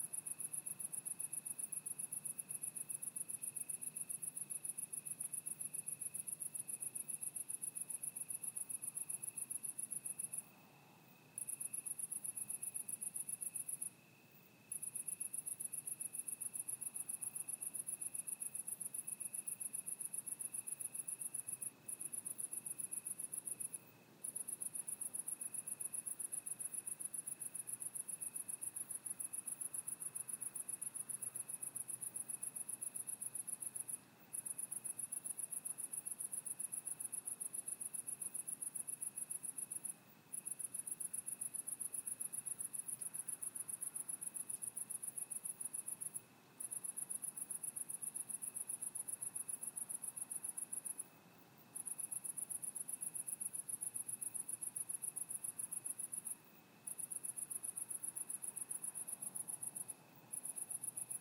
Solo Cicada calling at night. Crickets are in the background.
Ambience, Bruere-Allichamps, Cicada, Crickets, Field-Recording, France, Night